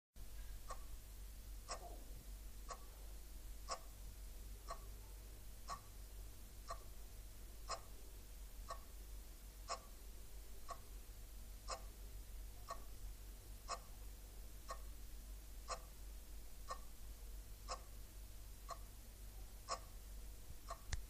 Les agulles mogudes pel mecanisme del rellotge de paret.